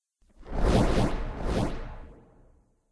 Magic Missiles
Several magical missiles being launched.
magic, missiles, spell, wizard